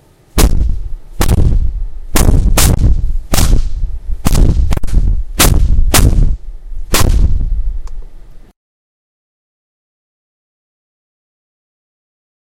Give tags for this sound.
slamming
slam

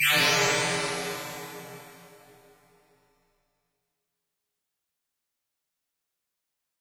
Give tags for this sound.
laser
machine
reverb

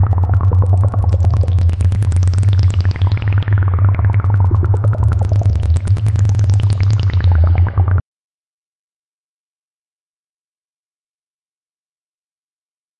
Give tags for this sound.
sf
outerspace
alien

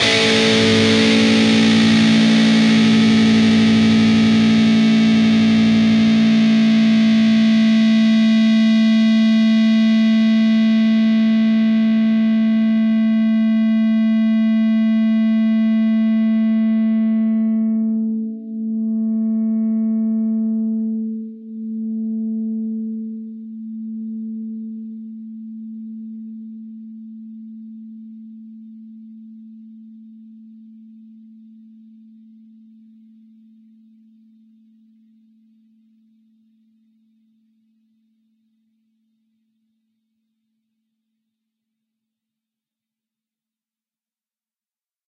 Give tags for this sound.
chords
distorted
distorted-guitar
distortion
guitar
guitar-chords
lead
lead-guitar

